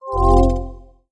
Soft, cascading synth chord that indicates a video call being ended in an app.
alert, ringtone, video-call, synthesis, melodic, phone-call, mobile, cellphone, call, phone